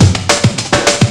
jungle rot loop
Experimenting with beats in analog x's scratch instead of vocal and instrument samples this time. Don't dance too much it looks ridiculous.
percussion, jungle, dj